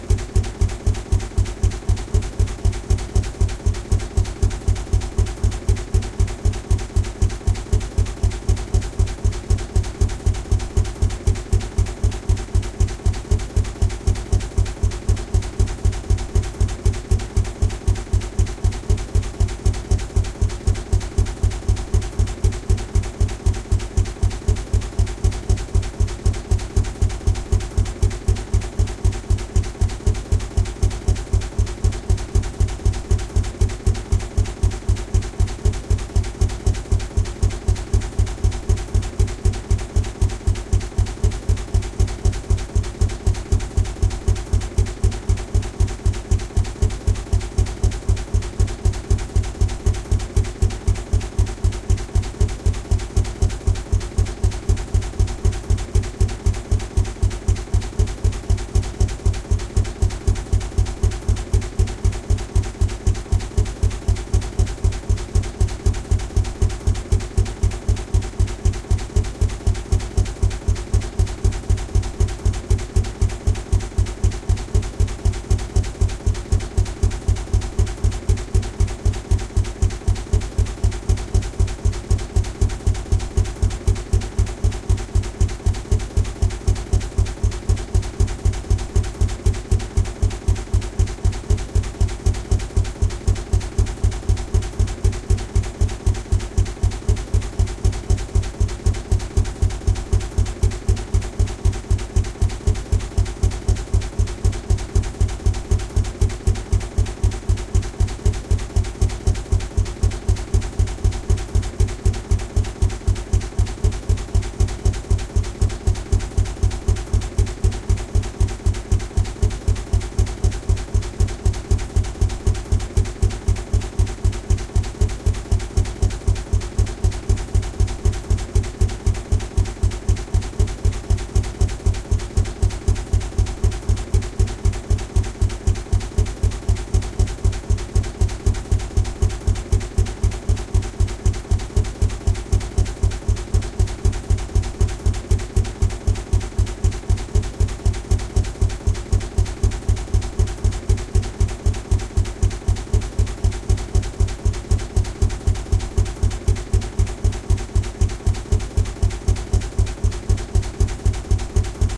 chitty bang sound tickling loop
A processed sound from "erdie lanz bulldog tractor engine outside" with looping on the tickle
chitty, outside, ralent, tractor, bucle, starting, lanz, loop, acceleration, engine